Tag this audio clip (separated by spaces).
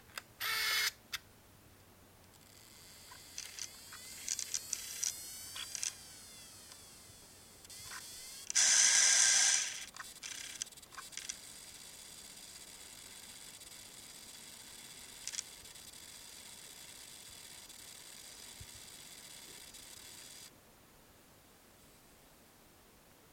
mechanical machine minidisc